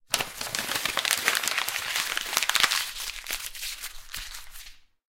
Crumpling a paper sheet.
Recorded with Oktava-102 microphone and Behringer UB1202 mixer.